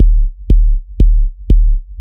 fat synthkick
electronic, kick, loop